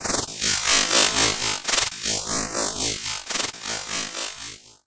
julie doreau son2
for this one I decided to use white noise, longer or shorter, modified with tremolo and a slower tempo and accelerated speed. Eevery sound was melted at the beginning and end, one noise has been reversed.